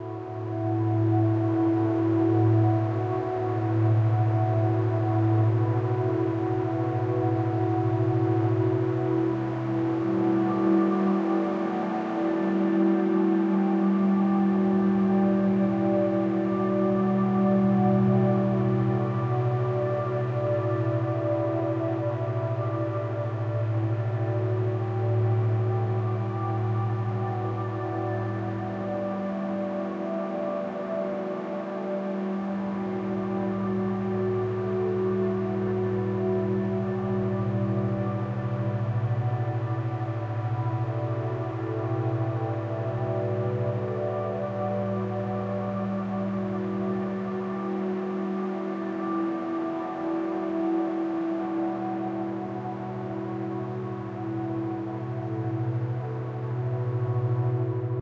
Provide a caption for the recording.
RIVER VALLEY
lush, meditative, soothing, airy, loop